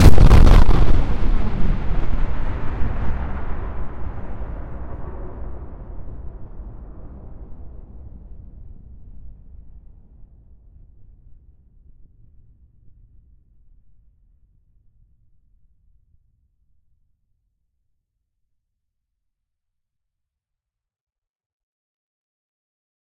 A totally synthetic explosion sound that could be the firing of a large gun instead of a bomb exploding. The reverberant tail is relatively long, as though the explosion occurs in a hilly area. But you can reshape the envelope to your liking, as well as adding whatever debris noise is appropriate for your application. Like the others in this series, this sound is totally synthetic, created within Cool Edit Pro (the ancestor of modern-day Adobe Audition).
blast, bomb, good, gun, synthetic